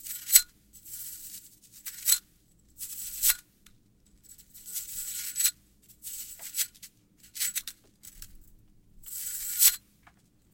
slinky Copy
coil, thrill, impact, evil, coins, machine, ghost, sac, garcia